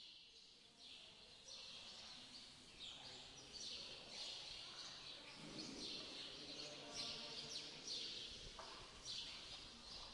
ptaki 10 sekund
spiew ptakow z zoo